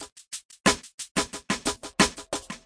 Stripped DNB1
A stripped DnB beat for intros, enjoy! This beat is best used with the others in my "Misc Beat Pack"
DNB, Frenetic, Hard, hitting